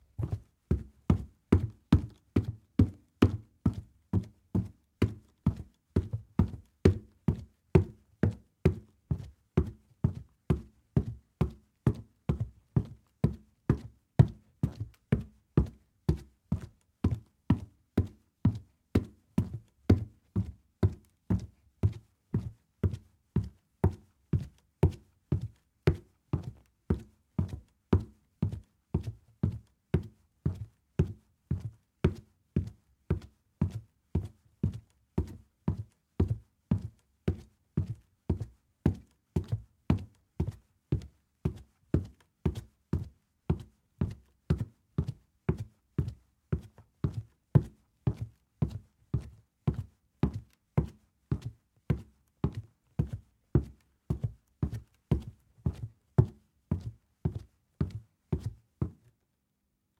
Footsteps Walking On Wooden Floor Fast Pace
Asphalt, Boots, Clothing, Concrete, Fabric, fast-pace, fast-speed, Floor, Foley, Footsteps, Hard-Floor, Hardwood-Floor, Heels, High-Heels, Man, Quiet, Running, Shoes, Sneakers, Staggering, Trainers, Trousers, Walk, Walking, Woman, Wood, Wooden, Wooden-Floor